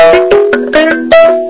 This mbira
(thumb piano) was a really crude one made for tourists. I believe it was from Tanzania. I remember that it had thin cut nails for tines. Recorded at 22khz